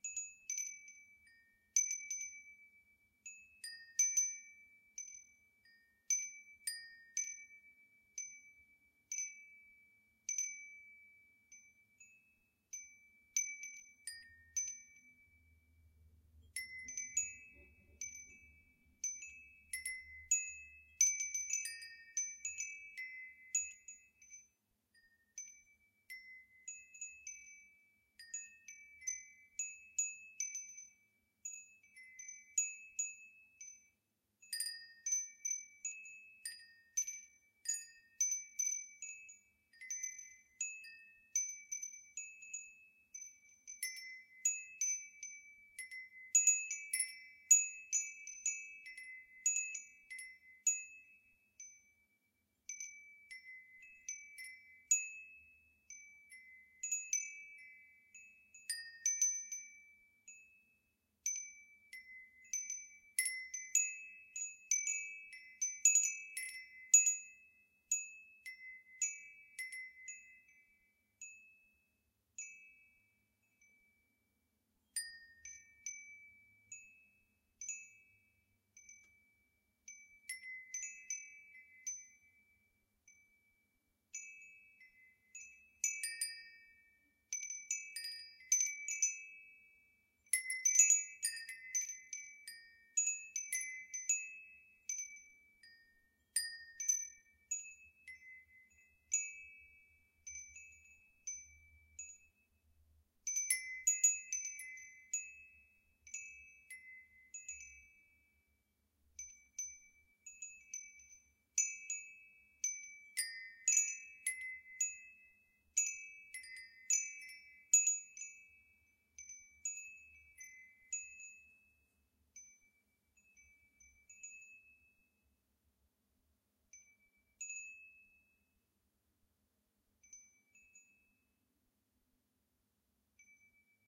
copper-chimes
A couple of minutes of small copper wind chimes, HQ, wind free.
windchimes, metal, chimes